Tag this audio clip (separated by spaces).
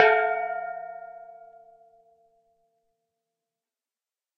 temple metal chinese percussion drum steel clang gong ring bell metallic hit ting iron percussive